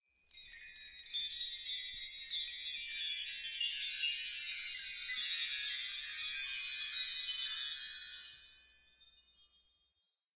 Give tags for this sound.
chimes; glissando; orchestral; percussion; wind-chimes; windchimes

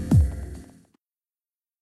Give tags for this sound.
beat; sound-design

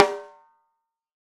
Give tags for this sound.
14x8; artwood; custom; drum; multi; sample; shure; sm57; snare; tama; velocity

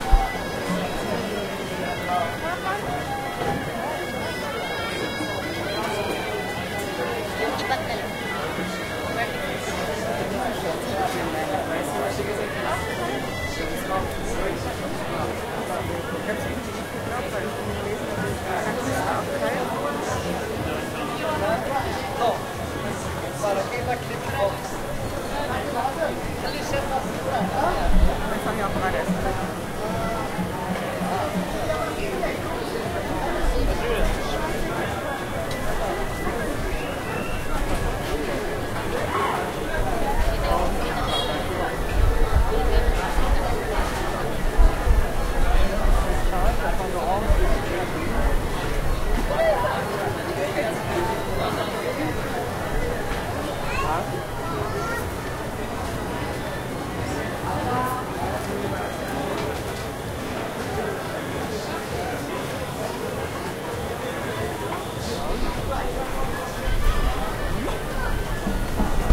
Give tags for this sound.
ambience; field-recording; Marrakech; Morocco

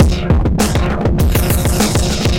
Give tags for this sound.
bit; crushed; dirty; drums; synth

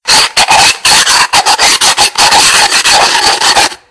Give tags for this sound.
shellssaintJacques; cityrings; France; mysounds; Rennes; Valentin; LaBinquenais

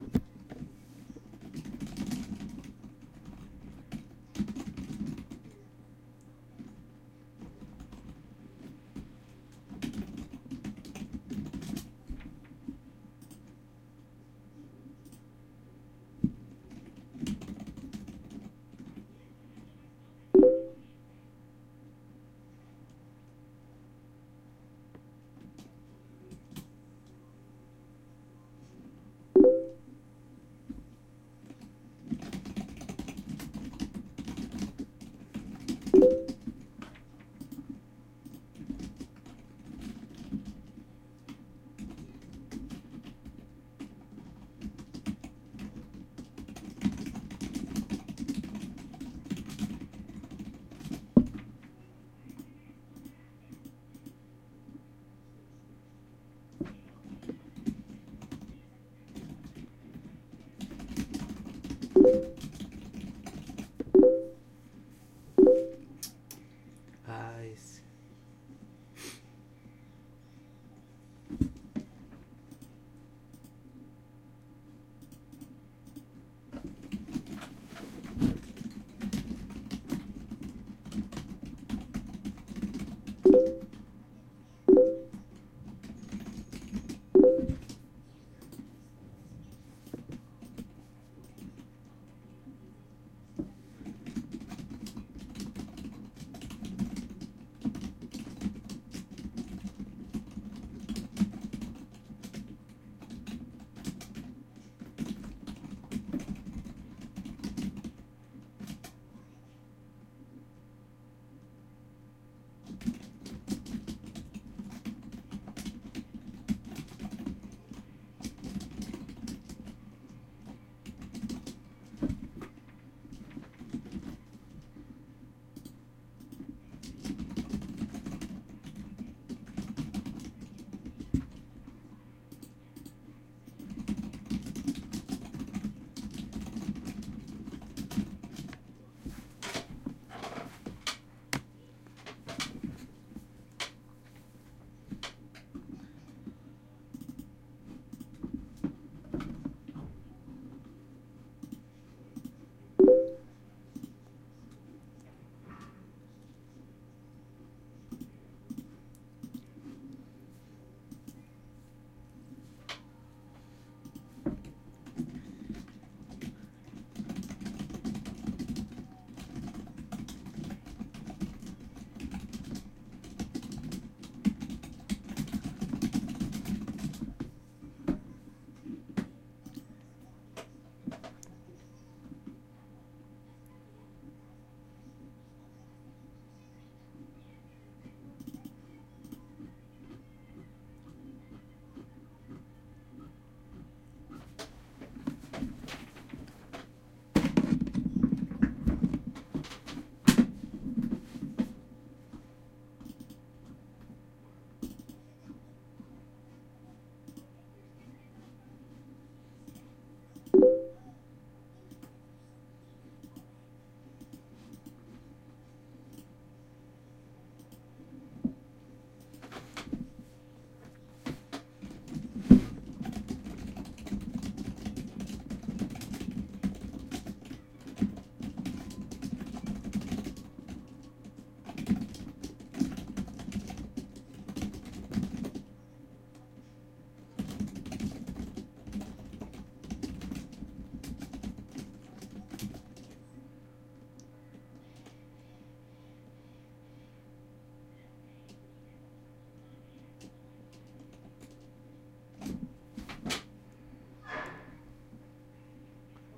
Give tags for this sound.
casa-asia; elsodelescultures; intercultural; raton; teclado

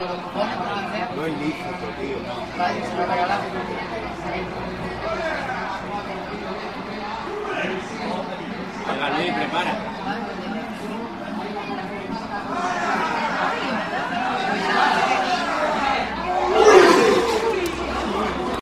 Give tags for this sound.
ambient; chance; football; goal; pub; sound

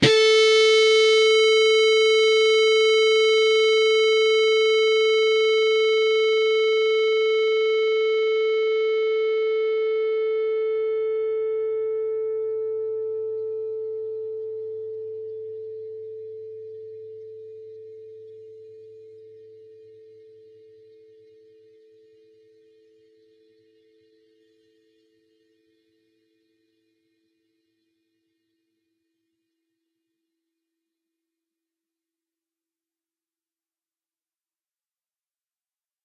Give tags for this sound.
distorted; distorted-guitar; distortion; guitar-notes; single; single-notes; strings

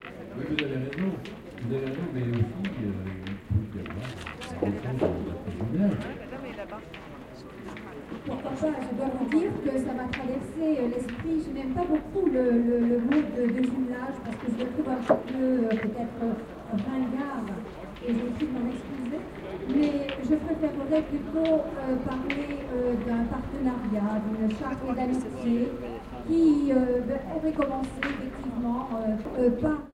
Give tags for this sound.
field-recording; speech

